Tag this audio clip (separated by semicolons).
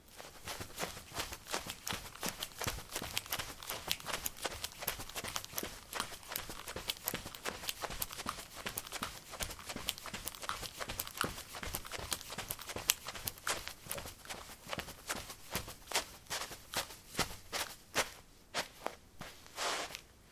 footstep; footsteps